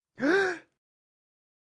Agitacion De Sorpresa s
agitation, male, surprise